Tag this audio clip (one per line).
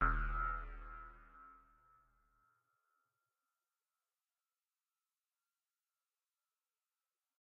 boing
cartoon
cartoon-sound
comedic
comic
comical
funny
humorous
short
silly